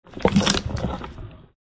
Some sounds designed from only animal sounds for a theatre piece i did.